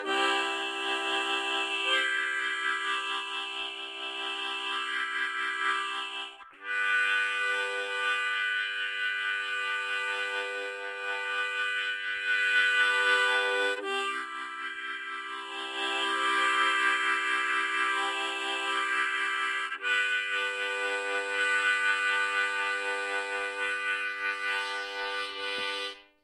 1-4 Hole Blow & Draw Chord 01
I recorded a segment where I blew all of the air out of my lungs through the 1-4 holes while widening and narrowing the air to periodically cut off the lows, and keep the mids to highs.
Chords, Harmonica, mouth, oscillations